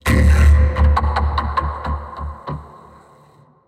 Robotic - Evil Laugh!
Recreation of a sound effect from King Crimson's "The Deception of the Thrush". Bass synth, distortion, vocoder, reverb.